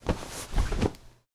Canvas Tent 3
Opening a canvas tent, (no zipper).